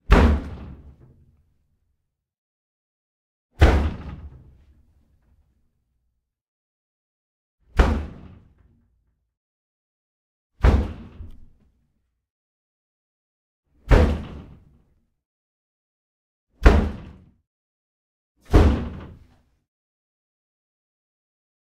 door slam distant roomy boom